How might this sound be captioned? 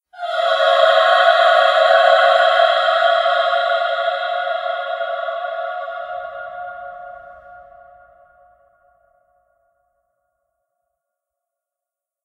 cluster chord reverb
Four notes recorded from the same person to create this "heavenly choir" cluster chord. Some reverb added. Created for a production of Jesus Christ Superstar when he is crucified.
heavenly, dissonant, reverb, chord, vocal, cluster-chord, choir, woman, voice, female, singing